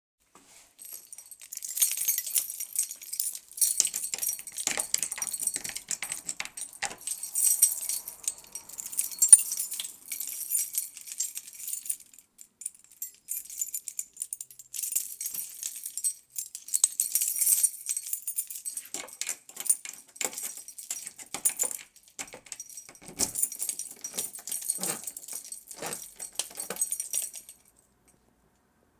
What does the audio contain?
A bunch of key shaking, moving to find the good key, trying to put the key on the lock and finaly, it's work
a, door, key, keys, open, ring, shaking, trying